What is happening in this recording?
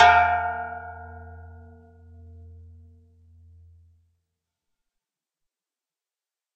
Gong - percussion 11 02
Gong from a collection of various sized gongs
Studio Recording
Rode NT1000
AKG C1000s
Clock Audio C 009E-RF Boundary Microphone
Reaper DAW
bell, temple, metallic, hit, steel, percussive, metal, iron, drum, gong, percussion, ting, ring, chinese, clang